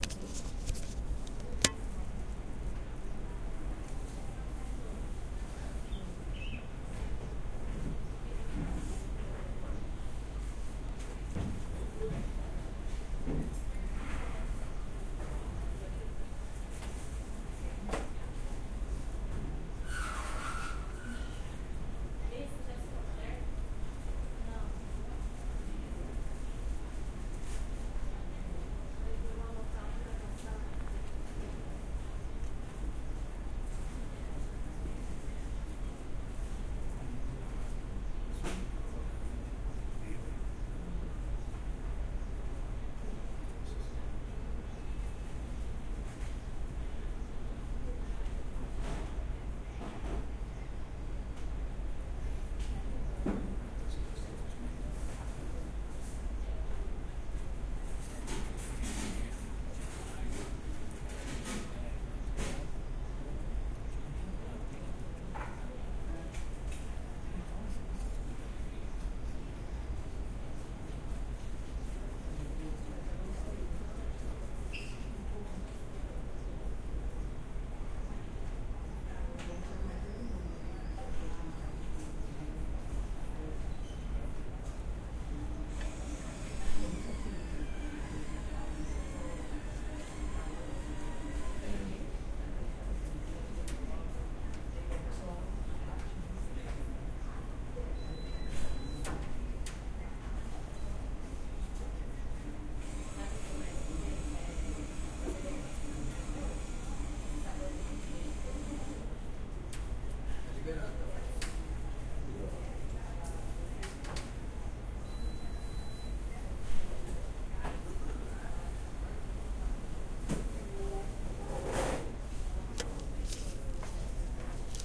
Room (People + Maintenance)
People chattering on the office and there's someone of maintenance working. Recorded with Tascam DR-03